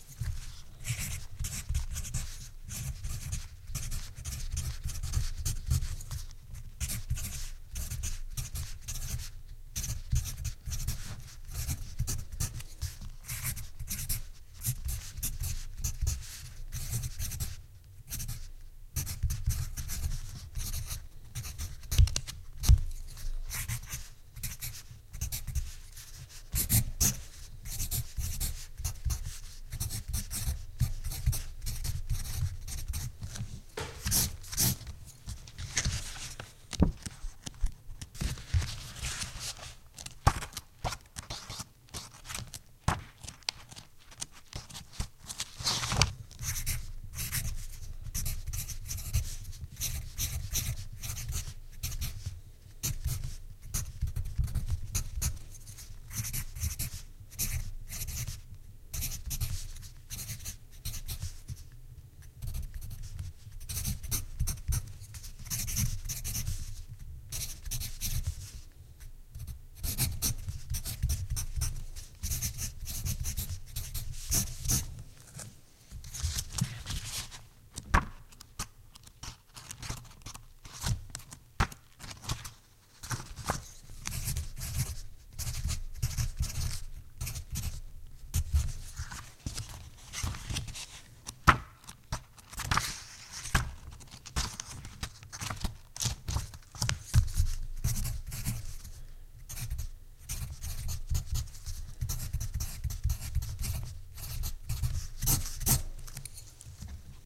Pencil writing on paper. Recorded in my office with a Sony PCM Recorder.